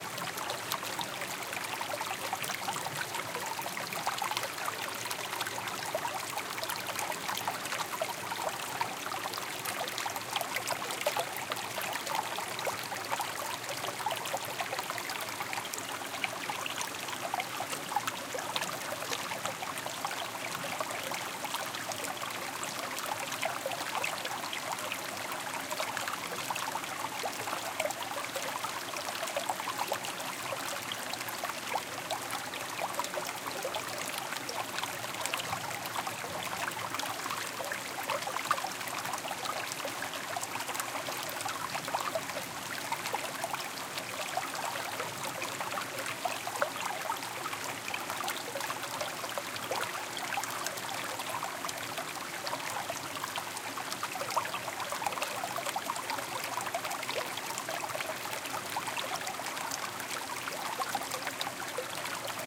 Recorded using Zoom MS configured microphone, tracks have been panned accordingly before uploading on to the web.
It was crowded that afternoon and the weather wasn't that great. Had a bit of thunderstorm and rain after the field recording session.
This sound of streaming water is not artificial, it was taken by the river where water streams down and hits the rock.